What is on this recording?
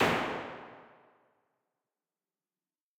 Large Dark Plate 01
Impulse response of a large German made analog plate reverb. This is an unusually dark sounding model of this classic 1950's plate. There are 5 of this color in the pack, with incremental damper settings.
Impulse, IR, Plate, Response, Reverb